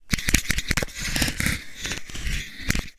manipulated recording of the back of headphones being rubbed together